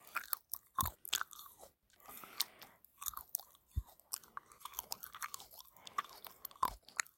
Ulsanbear eatingSlimeIceCream1
cream, slime, bite, food, eat, eating, chewy, icecream